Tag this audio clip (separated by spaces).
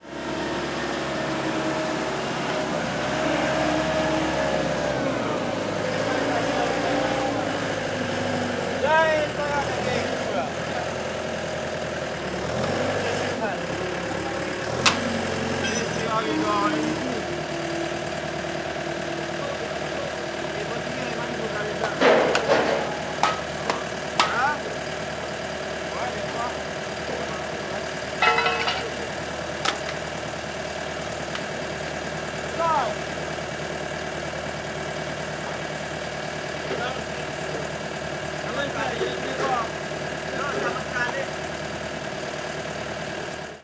building car field recording site truck work